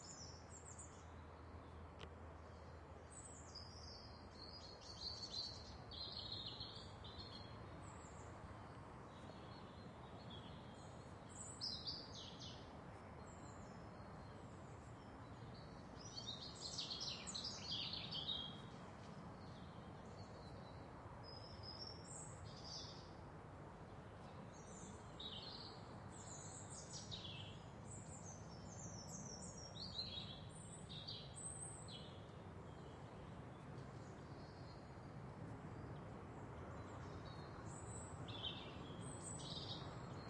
cefn on distant traffic birdies countryside
Recorded in woodland, with a busy motorway nearby.
traffic, birds, countryside